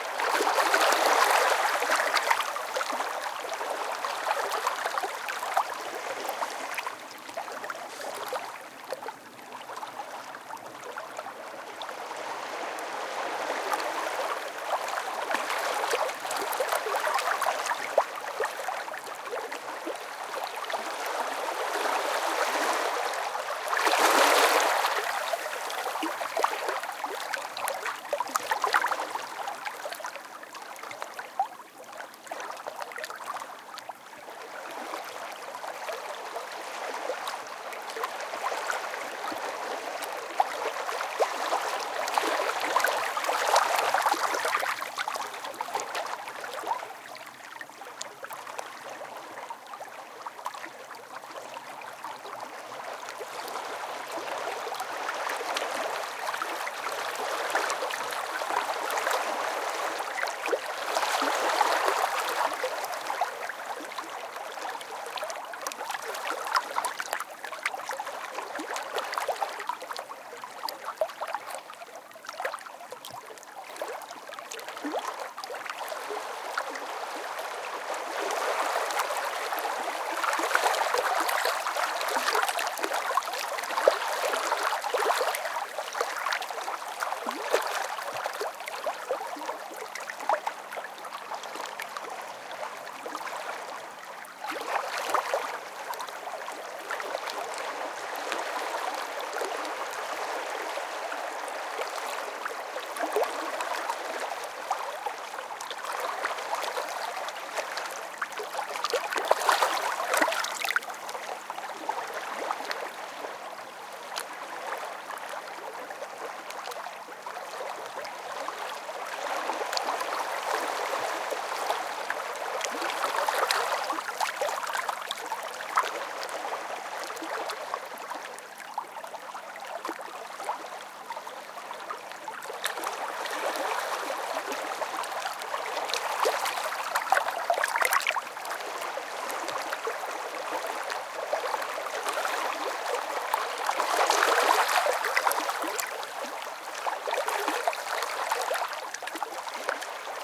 A stereo field-recording of gently lapping waves on a mixed sand / stone seashore. Zoom H2 front on-board mics.